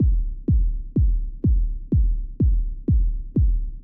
Kick house loop 125bpm
125bpm, kick, loop